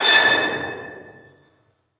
A short and clear glass breaking sound that nearly makes a note.